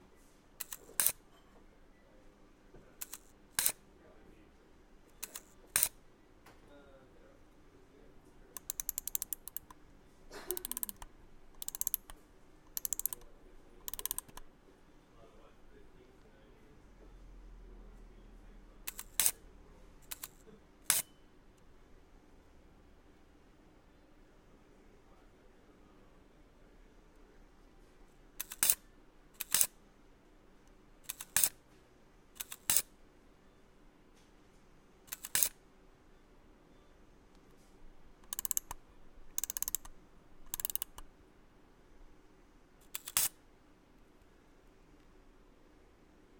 Shooting and winding a Robot Star II Recorder camera. This is a very small camera from the 1950's. There is some background noise from the store where this was recorded.